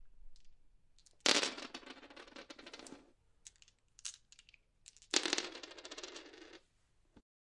This is the sound of someone rolling dice. Recorded with Zoom H6 Stereo Microphone. Recorded with Nvidia High Definition Audio Drivers.